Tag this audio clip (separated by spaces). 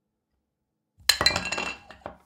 Drop Foley Kitchen-sounds Mug